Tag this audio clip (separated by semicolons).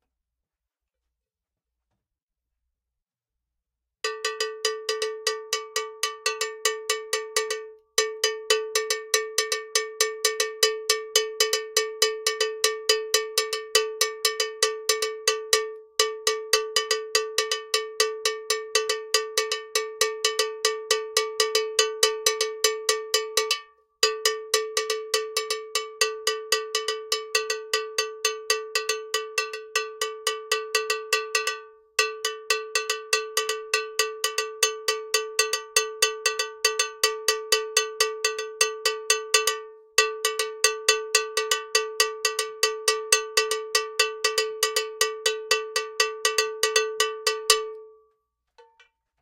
4; cowbell